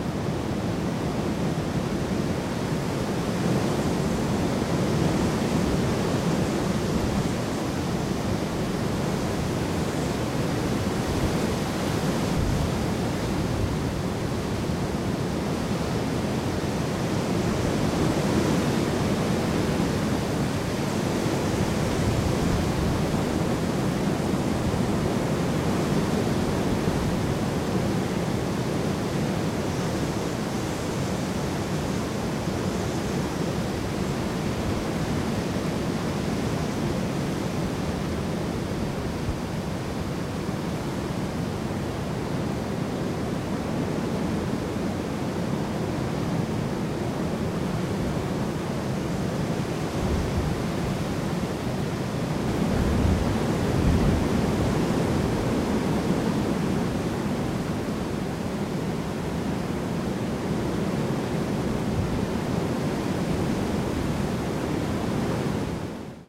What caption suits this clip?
Wind Through Trees
Recorded on a Zoom H4n with a shotgun microphone protected by a Rycote Cyclone wind-shield. The wind was blowing strongly through a small copse. This was in November and there were very few leaves left on the trees.
field-recording, nature, ambient-sound